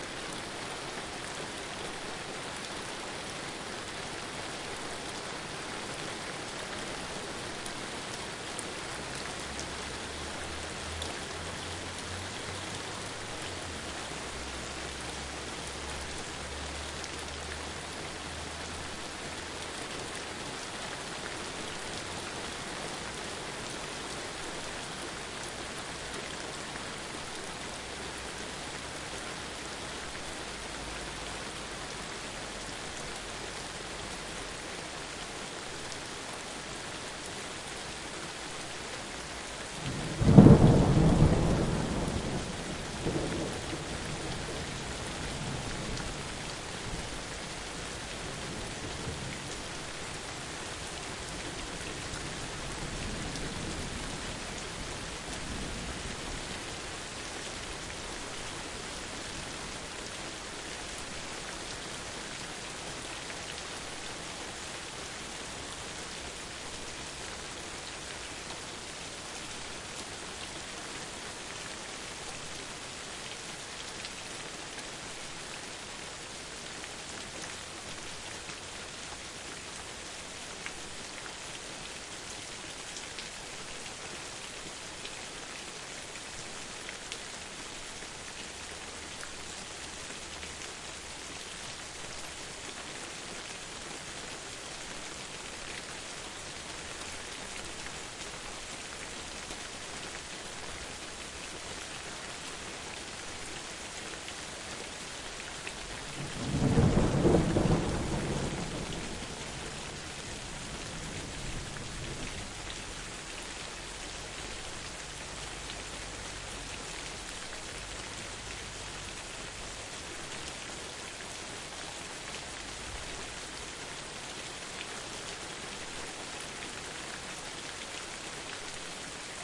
Rain with thunder
Rain with some thunderclaps in it.
rain, thunder